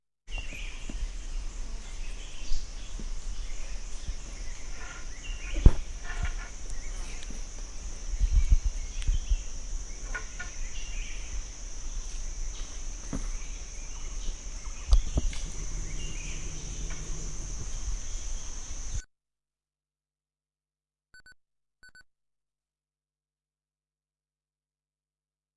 Recorded in Bangkok, Chiang Mai, KaPhangan, Thathon, Mae Salong ... with a microphone on minidisc
machines, street, temples, thailand